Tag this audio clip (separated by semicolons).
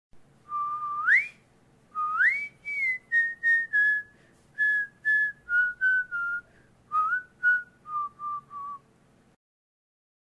song; music; whistle